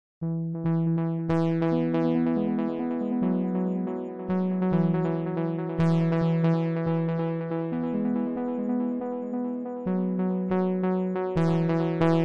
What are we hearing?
A few chords put together using an arppegiator and change in velocity.